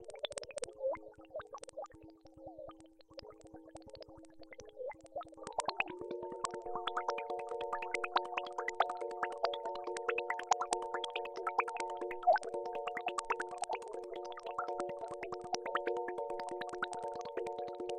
Filterpinged Mallet
Using modules through Analog Heat.
blip, eurorack, fx, sound, synthesizer